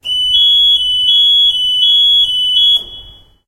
Grabación de la alarma de emergencia de un ascensor del campus de Upf-Poblenou. Grabado con zoom H2 y editado con Audacity.
Recording of the sound of an alarm of an elevator in Upf-Poblenou Campus. Recorded with Zoom H2 and edited with Audacity.